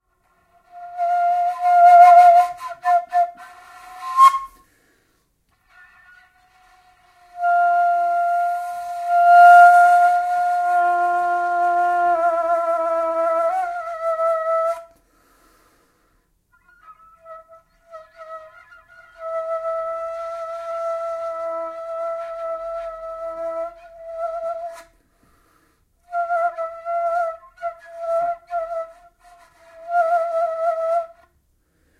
Kaval Play 07
Recording of an improvised play with Macedonian Kaval